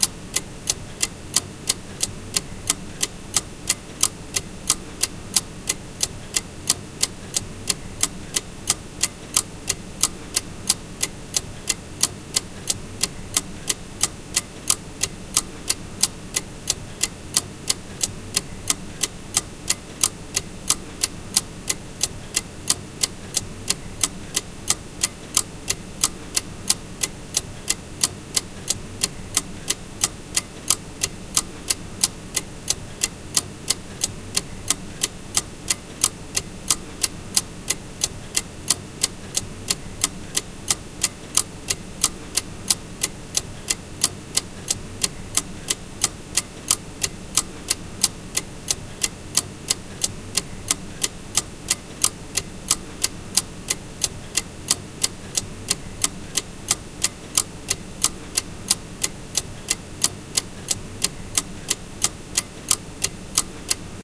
CLOCK TICK 1 minute longloop
Ticking of older table clock. Recorded with Zoom H4n, via Audacity. Normalisation + cut-and-paste loop up until 1 minute. NOTE: another clock is vaguely heard in the background.
clock, clockwork, ticking, tick-tock